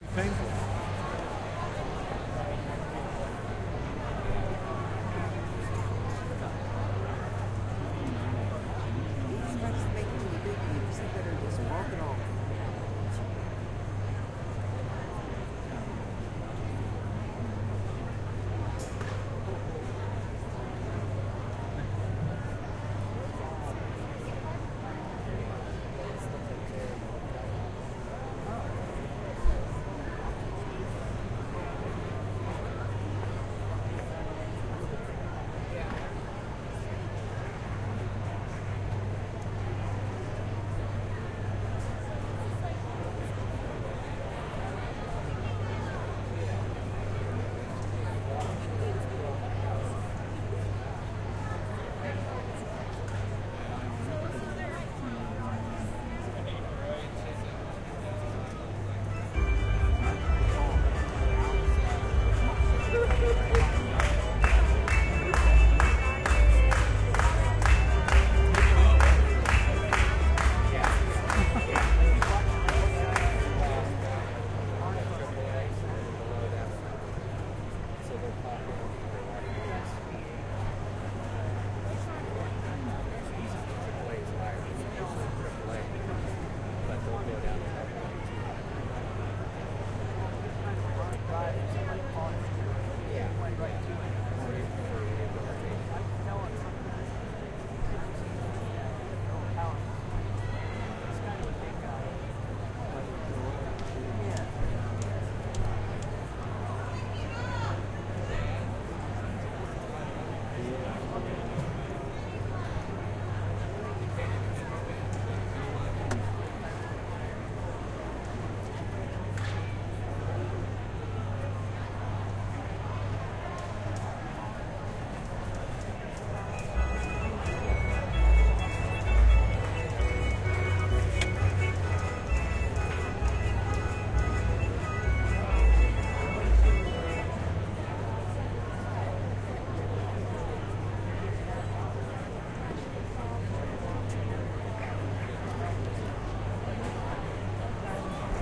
11comin round the mountain
The batter had just been struck by a pitch, and took first base. There's a quiet stretch while the next batter is getting up to the plate, mostly crowd murmurs. The organist tries to rouse the crowd a bit- without much success.
This one is good for general ambiance.
baseball, field-recording, minor, ambience, league, crowd